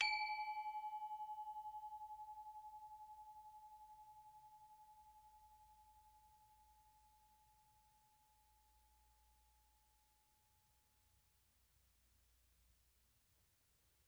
University of North Texas Gamelan Bwana Kumala Kantilan recording 5. Recorded in 2006.